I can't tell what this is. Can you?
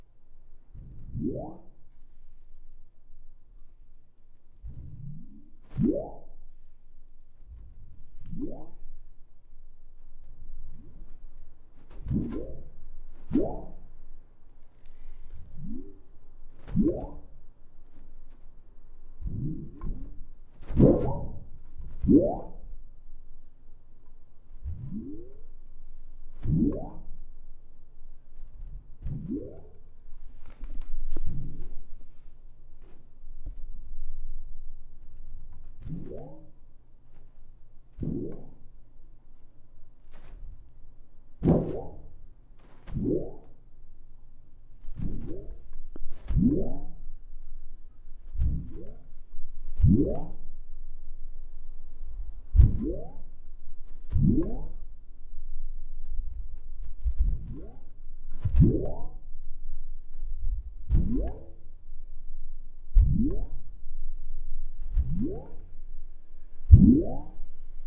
plastic wobble 06
Flexing a large (2 by 3 feet) piece of plastic while one end is on the floor.